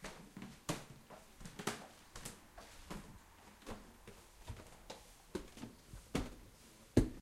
Creaking footsteps on stairs
crackle, creak, wood